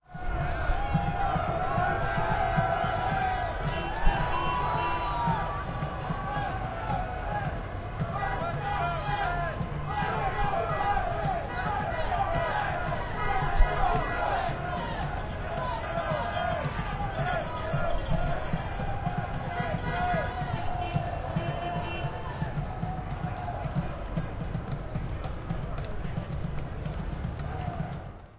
Celebration after Pittsburgh Penguins hockey game.